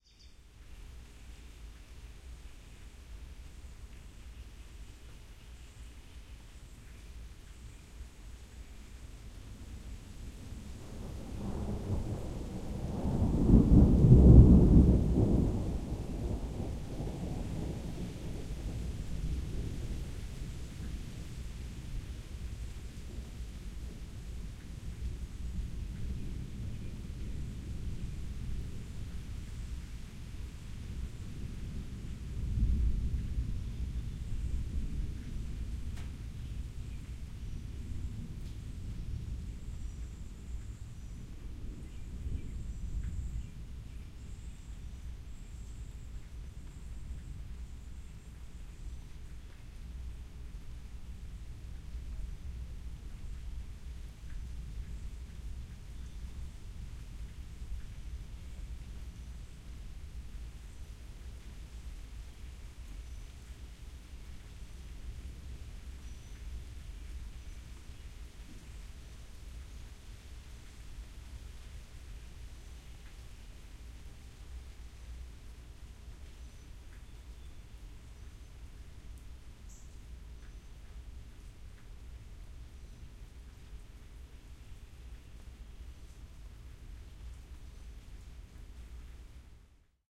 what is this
Thunder and Rain 2
Storm, Thunder